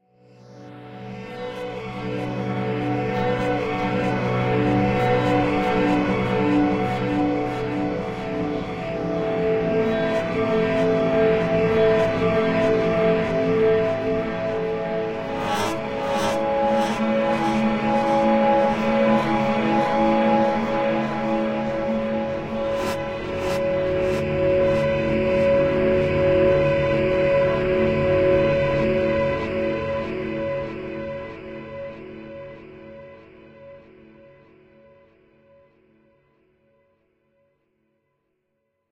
One in a small series of chords and notes from a digital synthesizer patch I made. A little creepy perhaps with some subtle movement to keep things interesting.